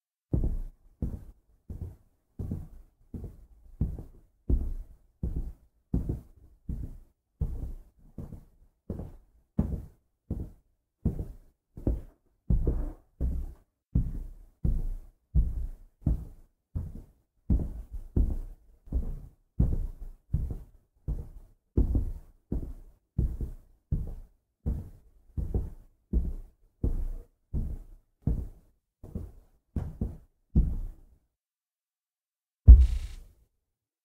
"upstairs neighbour" footsteps, recorded by walking one floor above a microphone that was pointing to the ceiling. parquet flooring on top of 35cm concrete, modern apartment.
MKH60-> ULN-2.